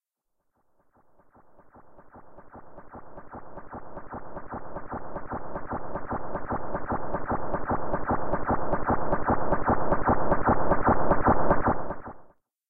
An effect heard in Mosquitmosphere 03... All sounds were synthesized from scratch.
atmosphere, dry, fx, hollow, insects, minimal, minimalistic, noise, raw, sfx, silence